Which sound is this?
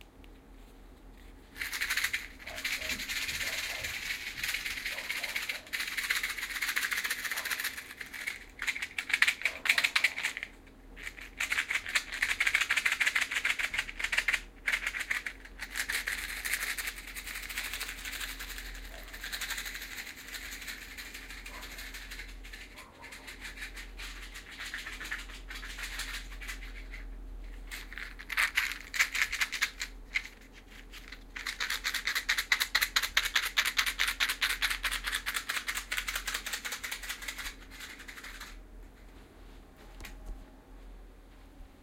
experiment-no1
Audible replication of Zuccarelli's holophony (realistic sound localization) - "matchbox shaker". Although this recording should be listened with headphones (binaural method), at the end - the "vertical axis" movement is perceivable even with one earphone. This shows how easy it's to achieve certain results, without expensive equipment and with proper understanding.
shaker, axis, binaural, realistic, spatial, vertical, holophony, effect